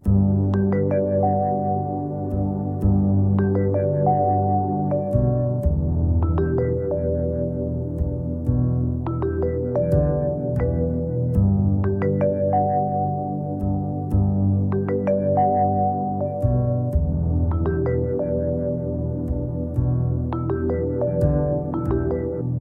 85 BPM Lofi Melody Loop
Lofi Melody leftover from one of the official sample packs i'm gearing up to release.